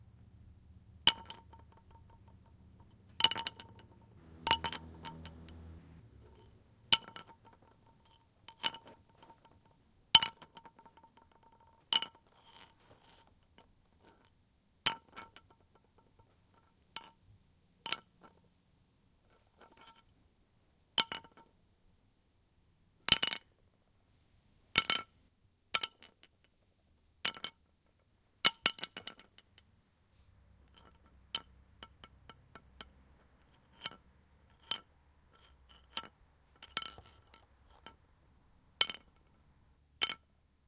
Smaller Horn dropped on Stone floor
Dropping a very small horn, a piece of an antler, on a stone floor.
Some car noises on the first few takes, sorry.
adpp, antler, dropped, floor, horn, stone